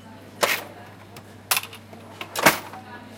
20141126 cashbox H2nextXY
Sound Description: Kasse öffnen, Geld, schließen
Recording Device: Zoom H2next with xy-capsule
Location: Universität zu Köln, Humanwissenschaftliche Fakultät, HF 216 (EG, Cafeteria)
Lat: 6.92
Lon: 50.933889
Date Recorded: 2014-11-26
Recorded by: Saskia Kempf and edited by: Tim Meyer
Field-Recording, Cologne